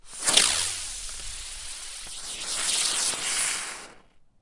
spinning firework 2
Setting off a spinning type of firecracker, sadly has some microphone wind in it
stereo, field-recording, spinning, sparks, firecracker, fire, wind, bee